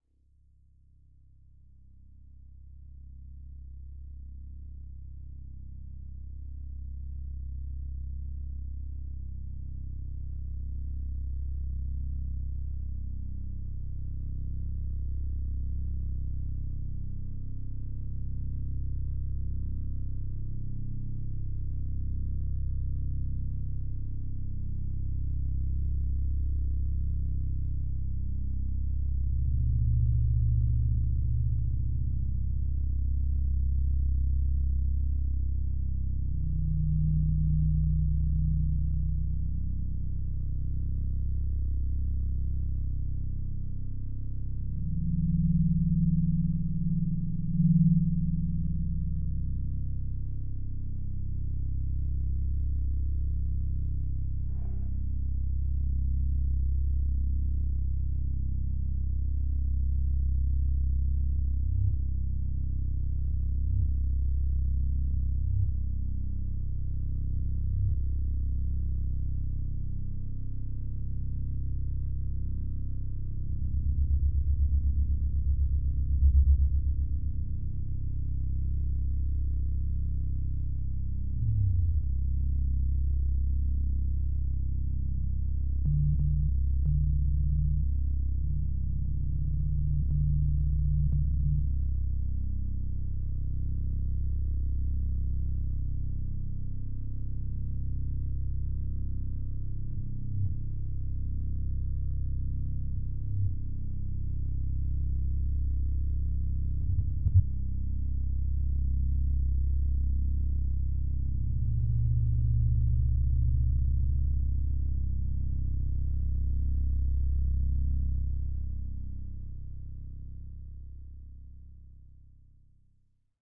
spooky ambience 1
A scary or dark ambient sound. Made in FL Studio with 3x Osc and SimSynth.
ambience, ambient, atmosphere, background, creepy, drone, horror, scary, synth